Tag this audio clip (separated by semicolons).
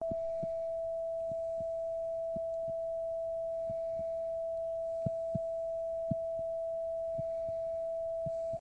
ringing; tinitus